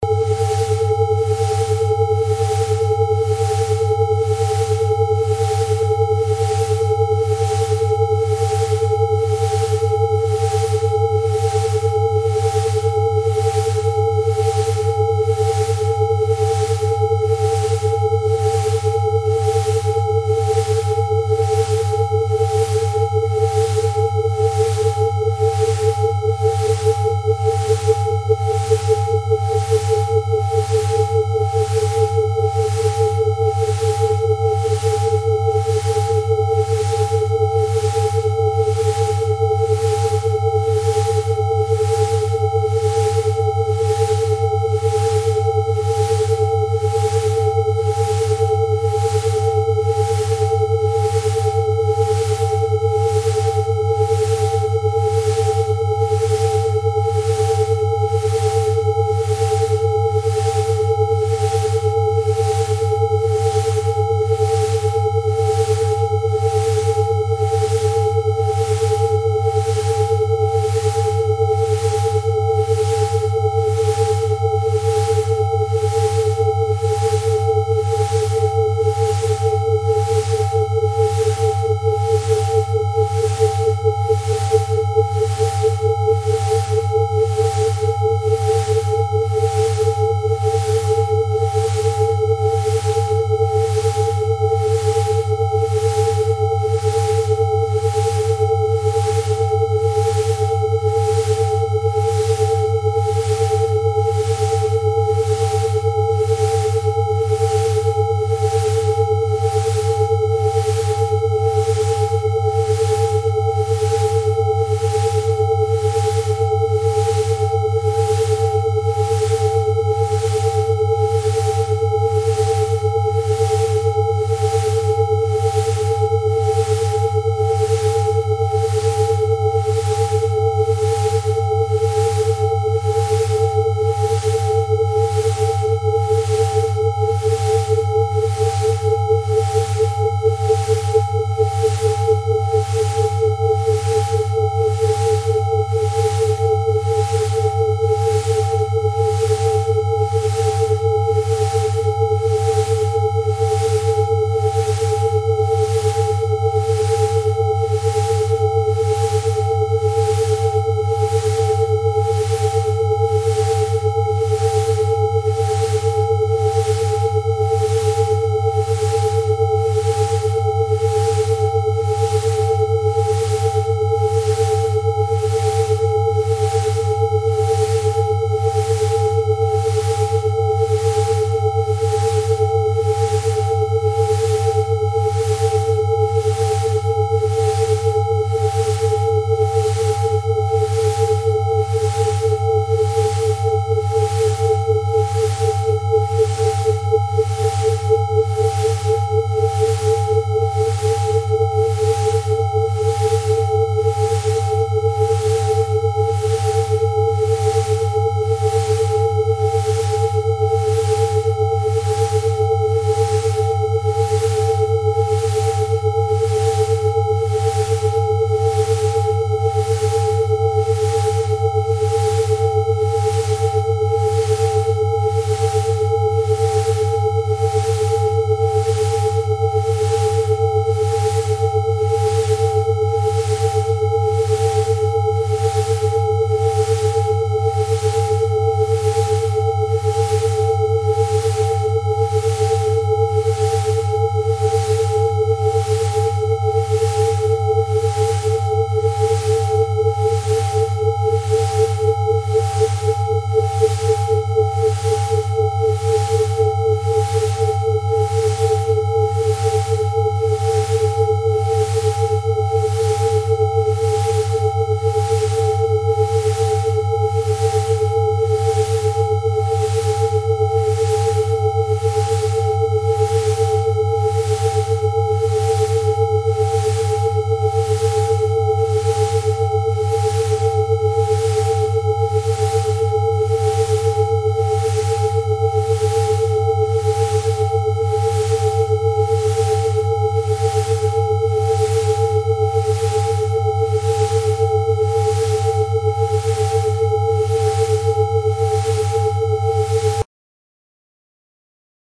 Track eight of a custom session created with shareware and cool edit 96. These binaural beat encoded tracks gradually take you from a relaxing modes into creative thought and other targeted cycles. Binaural beats are the slight differences in frequencies that simulate the frequencies outside of our hearing range creating synchronization of the two hemispheres of the human brain. Should be listened to on headphones or it won't work.
wave, brain, alpha, beat, bianural, gamma, delta